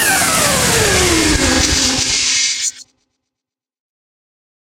Electronic Sweep Down 1
An old digital camera lens adjusting on power up. Recorded with Tascam DR 05. Processed in Logic Pro 9 using a plate reverb, delay, and automated pitch shifting sweeping downward.
broadcasting, sound